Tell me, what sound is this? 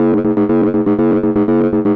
po32 drum beat rhythm percussion-loop drum-loop groovy fat